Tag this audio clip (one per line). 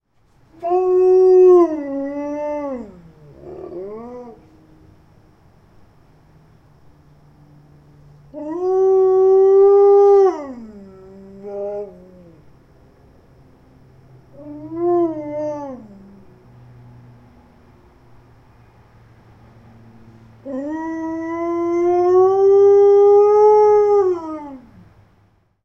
moan
growl
dog
howl
bark
malamute
Wolf
husky